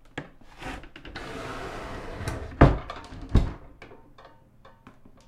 Door Shut Mid
A really creaky door in my house.